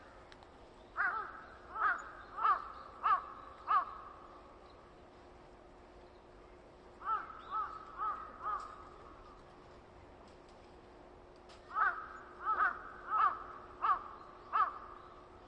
Japanese raven in a park. Saitama (japan). Nov 2013 Marantz PMD 661 MK II portable recorder.
Bird, Japan, Raven